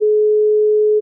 Phone beep call RUS
Standard telephone dial tone in Russia: Sound 425 Hz - 1sec. Pause between beeps should be 4sec.